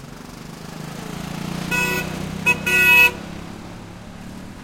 auto horn honk in traffic Middle East Gaza 2016
East, Middle, traffic, honk, auto, horn